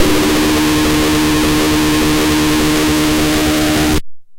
Another distorted drone sound.
robotic, distorted, machine, drone, mechanical, factory, machinery, industrial, robot